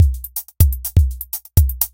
track12drum
part of kicks set
drum, electronica, trance, kick